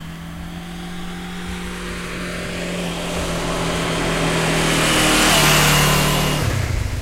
Motorcycle passing by (Honda CBF500) 6
field-recording, honda-cbf500, stereo, motorcycle, engine, motor, moto, tascam